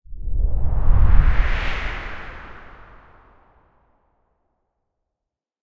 White noise soundeffect from my Wooshes Pack. Useful for motion graphic animations.